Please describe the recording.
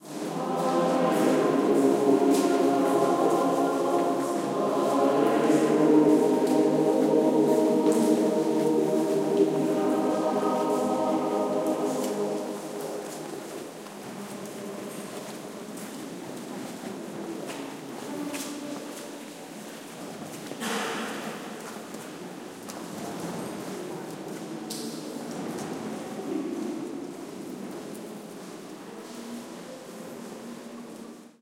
Choir sings "Halelujah" inside the Cathedral of the city of Lugo (Lugo Province, N Spain). Echoes and soft noise of people walking inside the church. Primo EM172 capsules inside widscreens, FEL Microphone Amplifier BMA2, PCM-M10 recorder.
cathedral, church, field-recording, Lugo, mass, voices